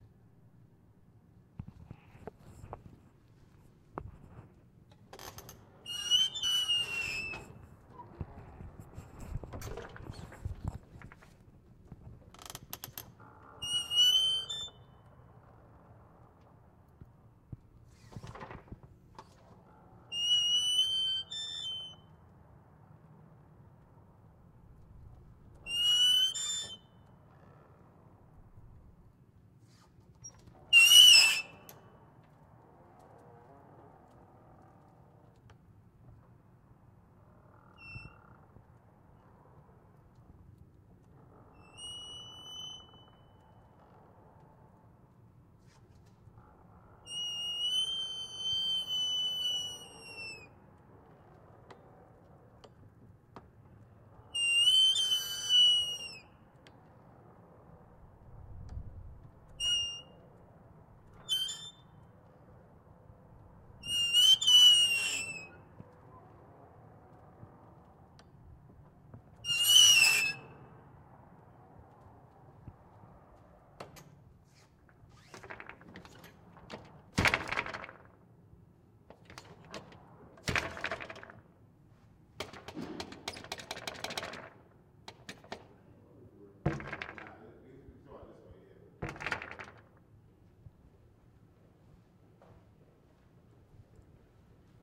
Squeaking Exterior Door Glass Metal Monster
This is a stereo recording of an exterior glass and metal door on a university campus in New Jersey, USA. There are several articulations of the door here that lend themselves to creature and robot noises. There is a whimper and a sigh and a screech as well as some ticking and clicking. This reminded me very much of baby godzilla type noises. I've put these into IRIS 2 and had a good time making synth patches with them.
breath, close, creak, dino, dinosaur, door, growl, metal, spectral, squeak, whimper